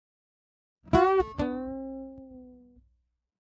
Sonido: 15
Etiquetas: loserSound Audio UNAD
Descripción: Captura sonido loserSound
Canales: 1
Bit D.: 16 Bits
Duración: 00:00:03
Categoría: Music
Equipo de captura: Epiphone AJ Scarlett 2i4 PT 10